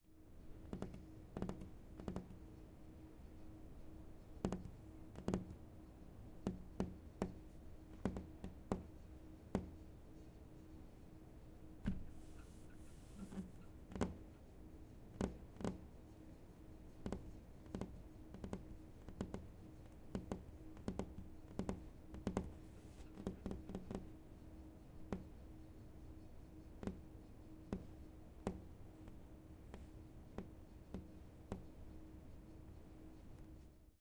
Tapping fingers 2
Recorded with a zoom H6. Tapping fingers against a table. Hollow sound.
impatiently, table, drum, fingers, OWI